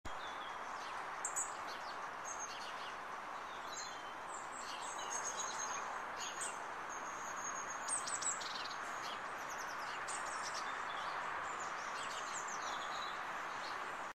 bird song
This is a sound clip i took in the morning near where i work. Taken about 7.30 in the West Midlands.
Bird, morning, song, wind